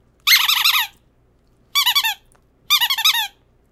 Dog squeak toy
A squeaker toy that my dog enjoys a great deal. Captured with yeti mic.
ball, dog, squeak, squeaker, squeeze, toy